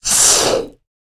studsboll sug v3
Item being sucked into a "vacuumcleaner"